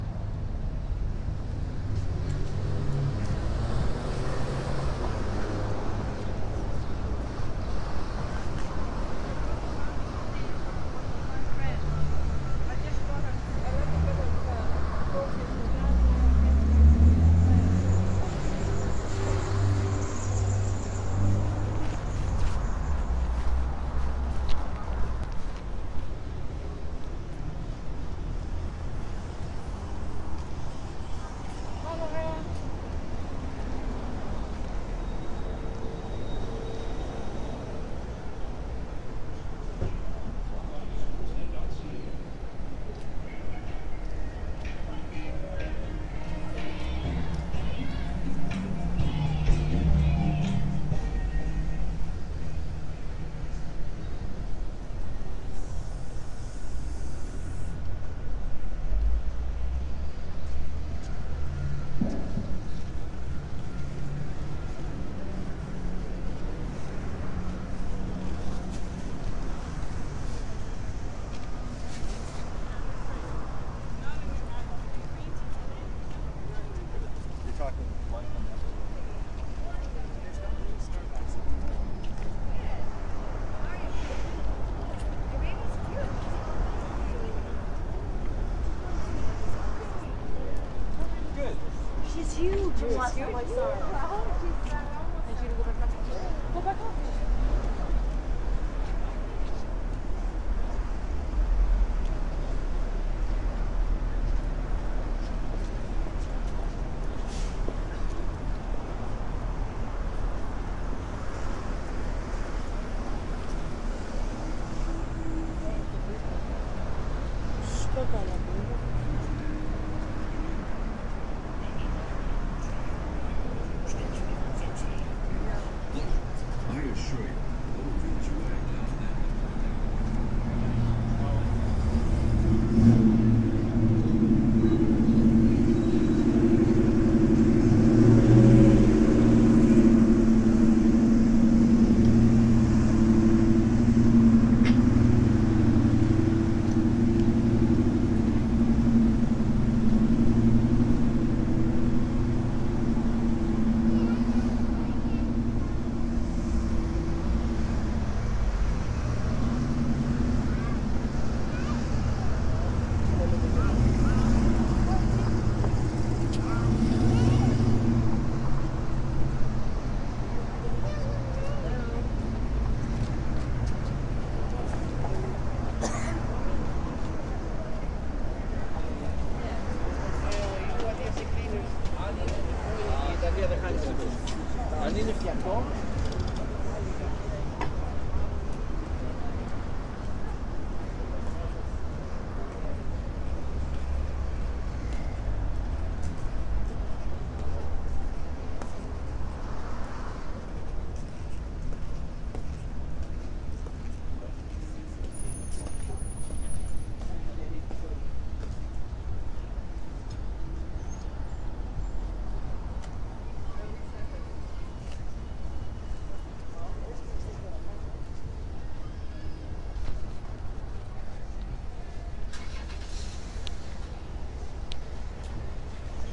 Mono recording of a city street. Some traffic, some voices.
road, sidewalk, city, field-recording